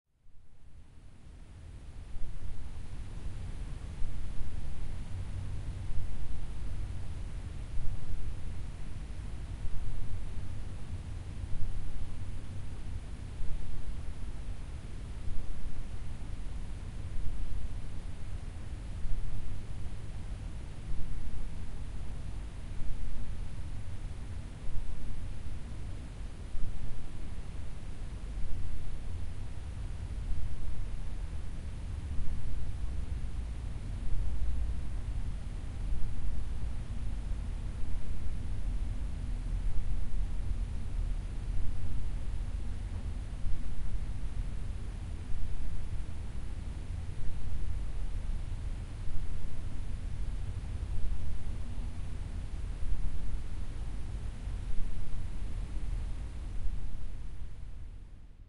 Quiet Room Ambiance Record With Blue Spark
Ambiance, Room, Quiet